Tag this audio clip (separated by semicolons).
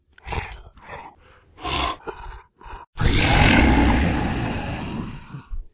animal; beast; breath; breathing; creature; dino; dinosaur; dragon; growl; hiss; monster; raptor; roar; trex; vocalisation; vocalization; werewolf